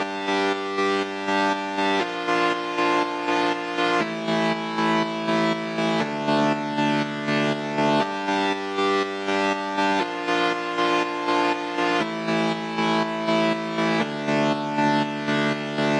Progressive Synt line
techno, progressive synthesizer
evolving, house, loop, melodic, morphing, progressive, techno